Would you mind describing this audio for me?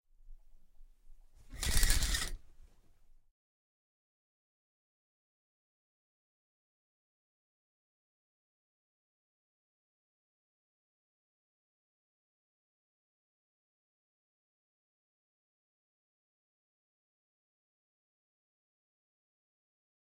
BATHROOM CURTAIN
Opening the shower curtain
bathroom, curtain, open